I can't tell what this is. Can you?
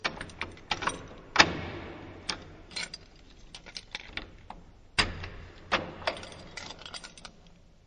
Clattering Keys 04 processed 04
shake, shaking, metal, clattering, rattling, rattle, motion, shaked